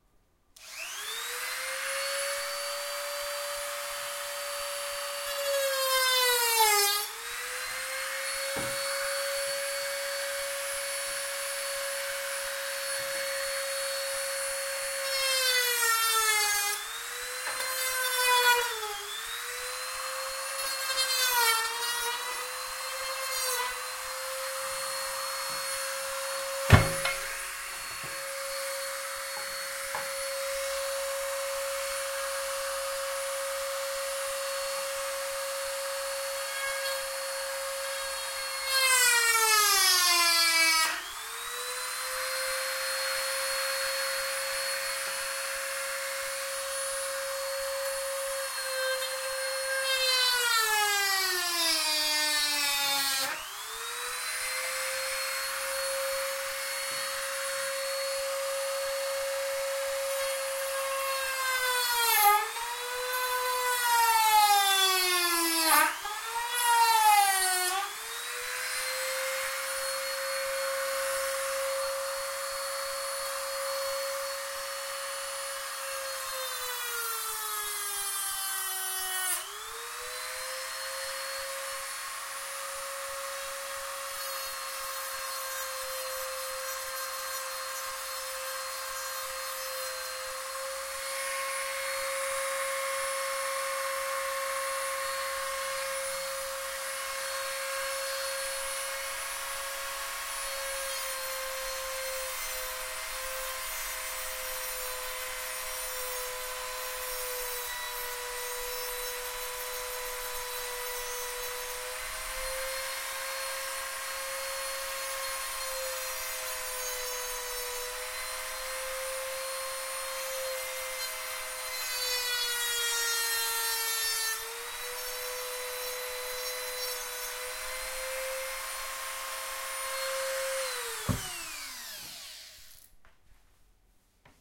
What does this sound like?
Dremel cutting nails that were on a piece of wood. Recorded with Zoom H1.
Micro retífica cortando pregos que estavam em um pedaço de madeira. Gravado com Zoom H1.